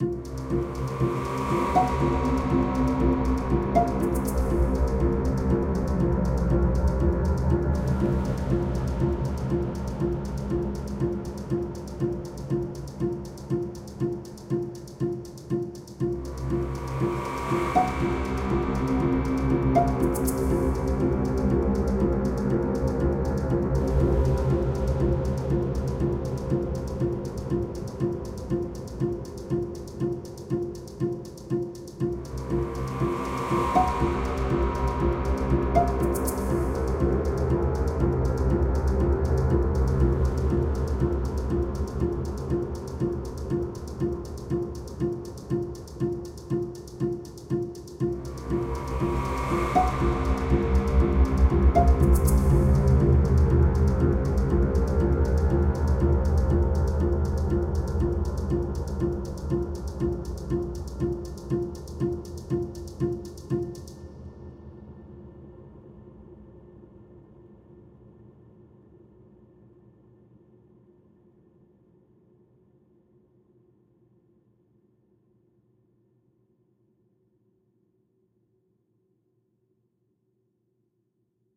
Orchestral Suspense Loop 2
Free!
120 BPM loop. Suspenseful and dramatic.
Passing, Music, Dark, Scary, Movie, Horror, Cluster, Cinematic, Atmosphere, Free, Being-Followed, Drone, Travel, Suspense, Orchestral, Thriller, Chase, Spooky, Film, Snooping, Ambient